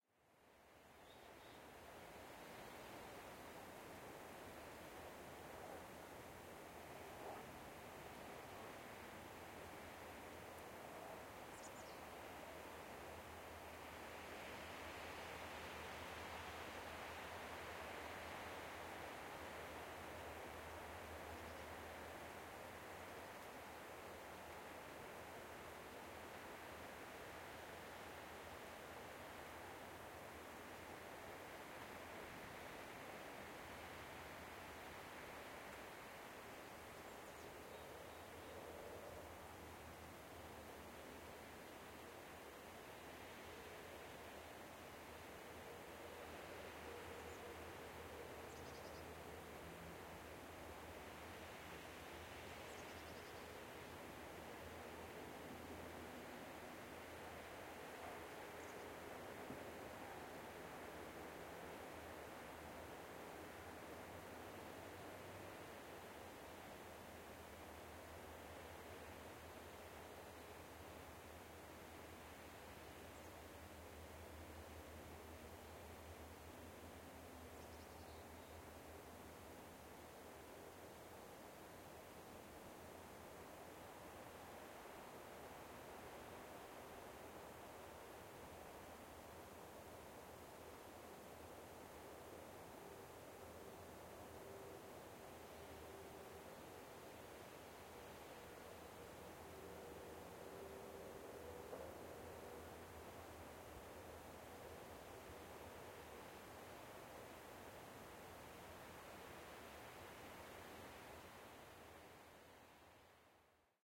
outdoor winter ambience birds light wind trees
Ambient recording of a semi-residential area in Banff, Alberta. Recording is quiet. Birds and light wind in nearby coniferous trees can be heard. Recorded using the zoom H2N recorder on the MS raw setting. Decoded to stereo.